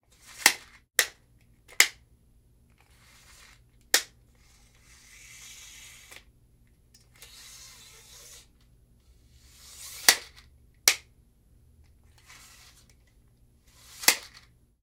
A few sounds made using a tape measure.
click, slide, snap, tape-measure